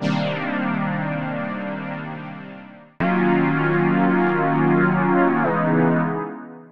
nice wave
i was fiddling around with an analog synth that went into a peavey km50 keyboard amp and i made this sound
it has loads of pitch editing giving it the house-ey feel to it and i think it was an fmaj5/9 chord with extra 6ths and 4ths
and this one is just a bit longer than the other one
techno, house